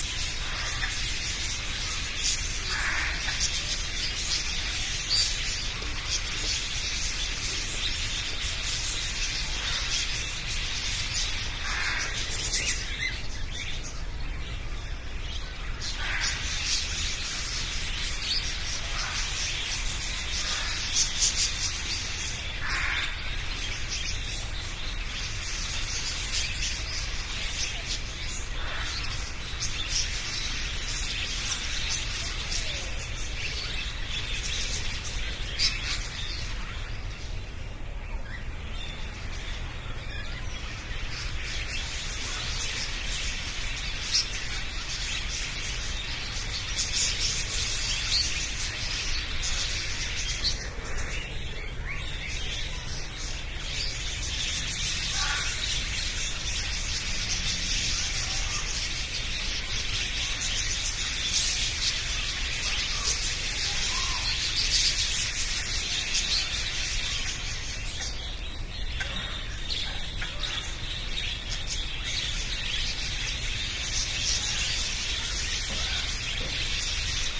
A very large number of Budgerigars calling, with an occasional Cockatiel. Distant macaws and other birds. Recorded with an Edirol R-09HR.
aviary, parrot, exotic, birds, tropical, field-recording, bird, zoo, budgerigar, parakeet, pet-store